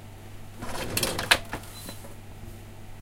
cashbox small market
Atmo in small market
Recorded on ZOOM H4N
asian, market, small, sound